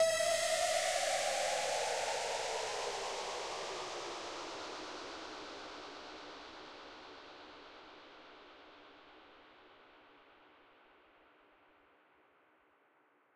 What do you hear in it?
FX Laser house falling 6 128
Falling effect frequently used in electro house genre.